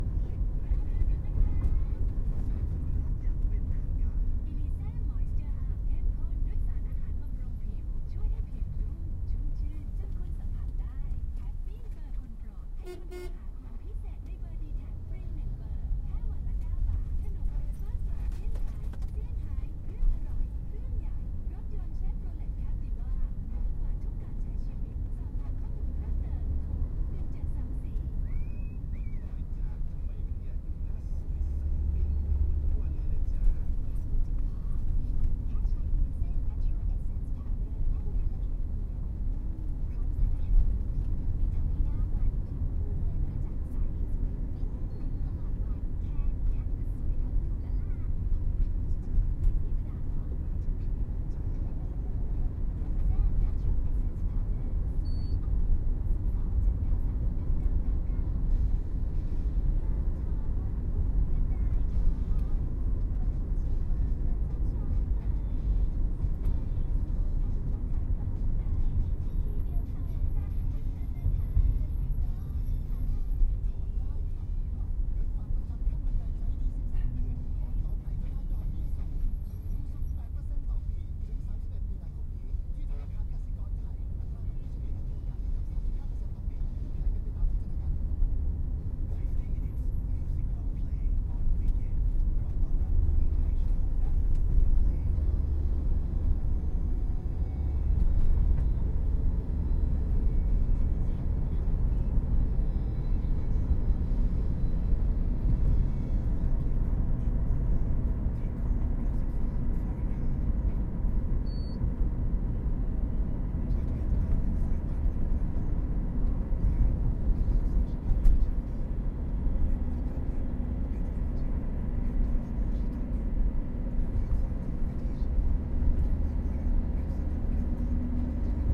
Bangkok Taxi Ride
Random recording of riding in a taxi in Bangkok.
bangkok, city, thailand